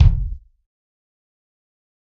Dirty Tony's Kick Drum Mx 089
This is the Dirty Tony's Kick Drum. He recorded it at Johnny's studio, the only studio with a hole in the wall!
It has been recorded with four mics, and this is the mix of all!
realistic, dirty, kit, raw, kick, drum, tony, tonys, pack, punk